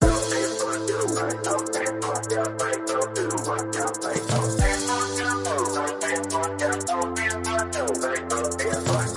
Jam Spotlight Lazytoms B
Made on Music Maker Jam. Could be used for background music for videos.
Gaming, or